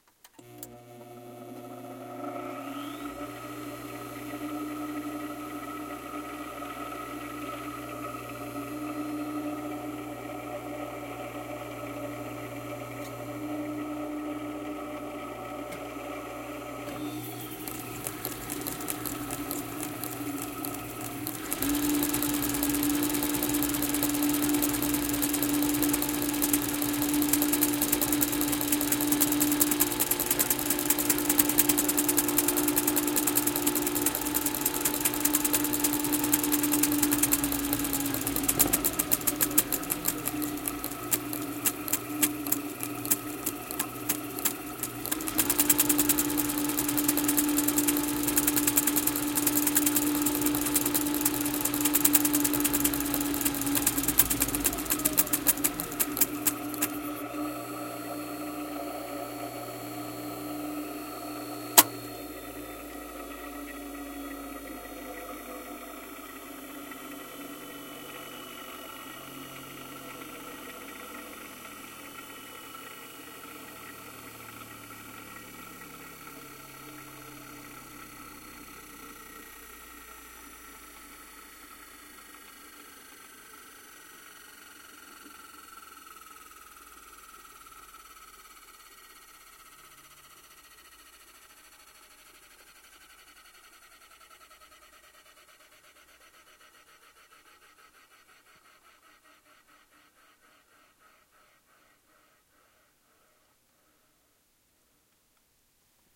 SewingMachine,AntiqueSinger,On,PowerUp,Run,VariousSpeed,Off,PowerDown,ST
Sewing machine recorded with an edirol r09, love the long winding up and down.
mechanical, sewing-machine, winding-down, winding-up